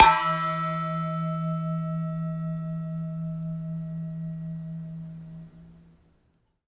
pot gong
Struck a glass lid to a metal pot to create a crashing gong-like sound.
clang, found-sound, instrument, percussion, hit, pan, kitchen, lid, gong, bang, pot, metal, drum